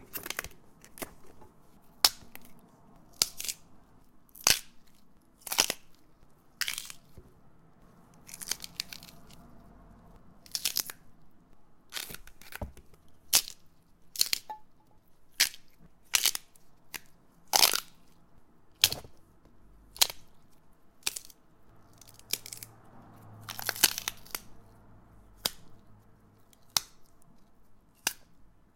Foley used as sound effects for my audio drama, The Saga of the European King. Enjoy and credit to Tom McNally.
This is a succession of sounds of me twisting sticks of raw celery by hand. They make a fairly horrifying bone-crunching sound that can be useful for SFX of breakages and gore. The track needs some cleaning up as some traffic sounds are audible in the background.